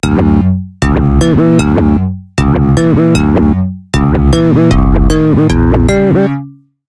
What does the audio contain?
Wicked Guitar
A Good Bass Guitar Sound,With an Abstract sound.